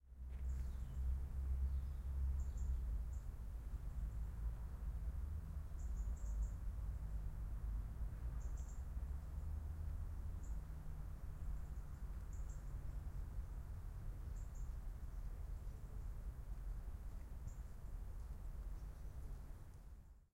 Ambient sound from Porto's Parque da Cidade.
birds, ambient